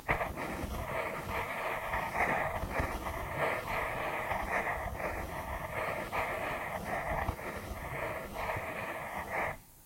As requested by user "sianelsie" in the forum, a pencil moving in the shape of ringlets.